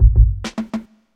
Fill 05 104bpm
Roots onedrop Jungle Reggae Rasta
Jungle, onedrop, Roots, Reggae, Rasta